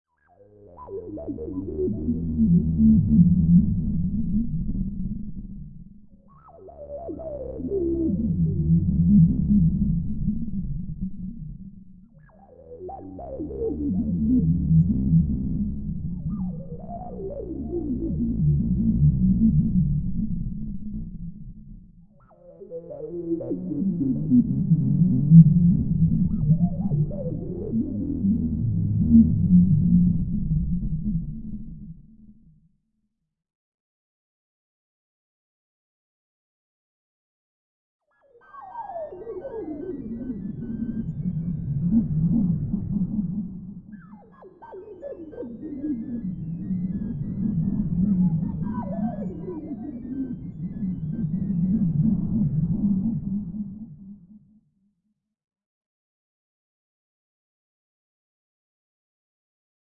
Very retro Italian sci-fi vibe. There is a little dead space near the end, but there is a variation of the loop/theme at the end so wait around.